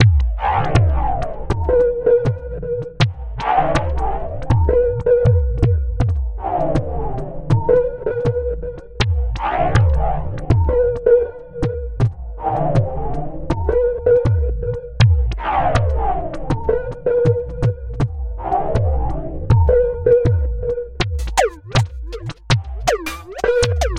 80 bpm Durbanville 23rd Century Attack loop 2
ATTACK LOOPZ 01 is a loop pack created using Waldorf Attack drum VSTi and applying various Guitar Rig 4 (from Native Instruments) effects on the loops. I used the 23rd Century kit to create the loops and created 8 differently sequenced loops at 80BPM of 8 measures 4/4 long. These loops can be used at 80 BPM, 120 BPM or 160 BPM and even 40 BPM. Other measures can also be tried out. The various effects go from reverb over delay and deformations ranging from phasing till heavy distortions.